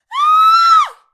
Woman Scream Monique 2
Close mic. Studio. Young woman scream.
horror, human, scream, woman